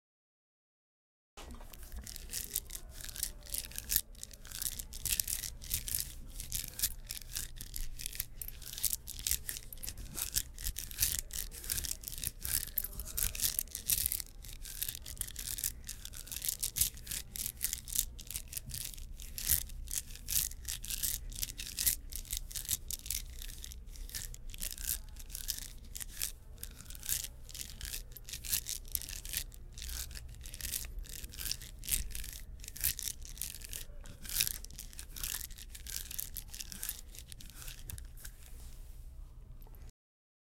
Arroz-passos-terra-cascalhos-mastigando